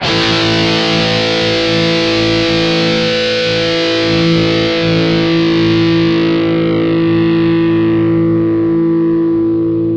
06 Dist guitar power a long
Long a power chord - Distorted guitar sound from ESP EC-300 and Boss GT-8 effects processor.
distorted, distortion, guitar, power-chord, rhythm-guitar